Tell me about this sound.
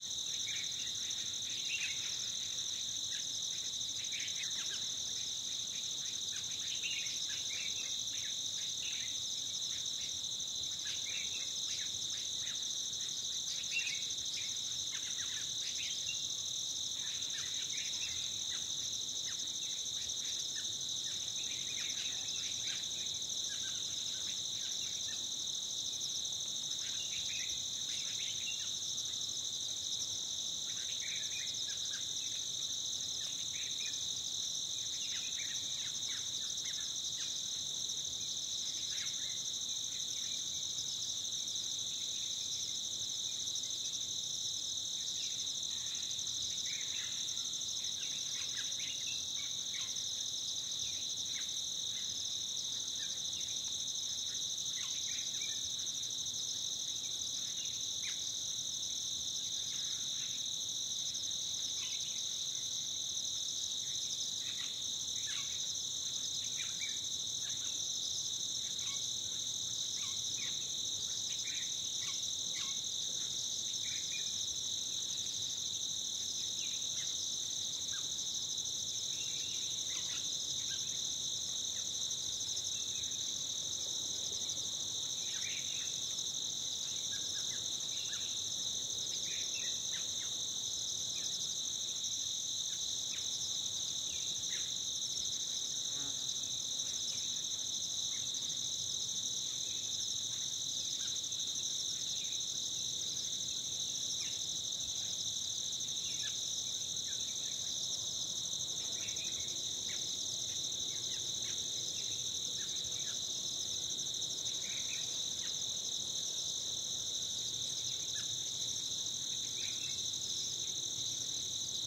Field recording of insects and bird calls in a clearing at daytime.